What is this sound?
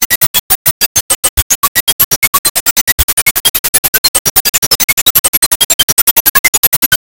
Metallic sound first granulated, then combfiltered, then waveshaped. Very resonant.`
comb, resonance, metal, waveshape, grain